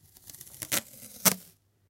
found teippi 3
Ripping a piece of scotch tape